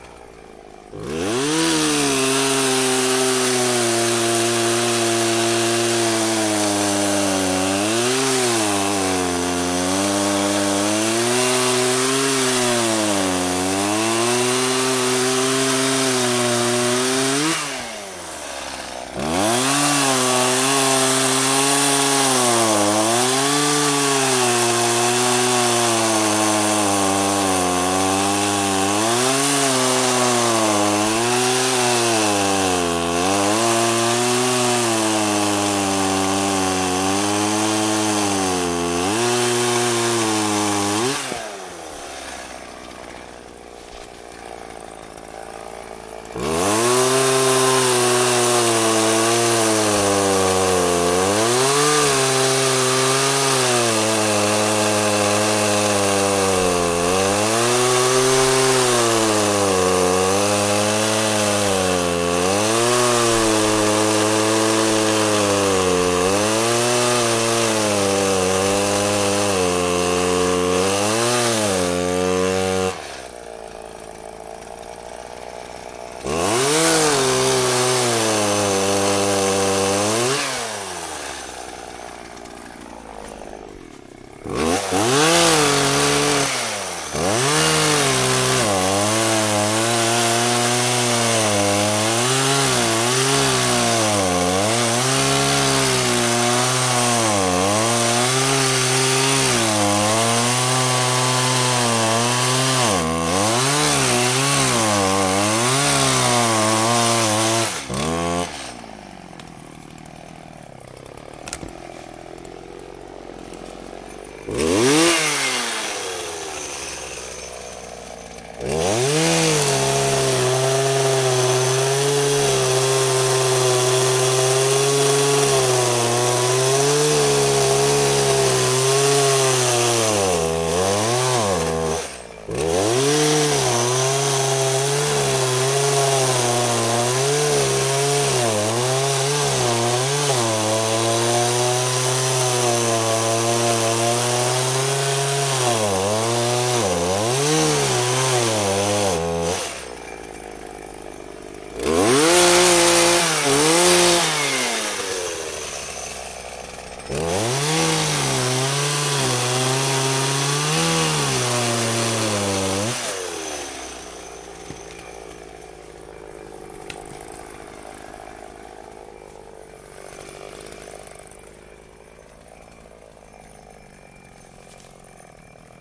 chainsaw sawing long closer various longer cuts
cuts, longer, long, closer, sawing, chainsaw